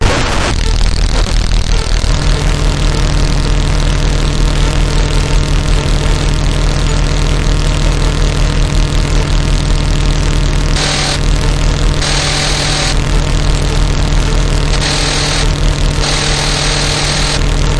circuitbent Casio CTK-550 loop7
bent, casio, circuit, ctk-550, sample